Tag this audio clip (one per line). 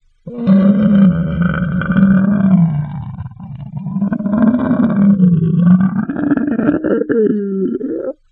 Dragon
Pain
Scary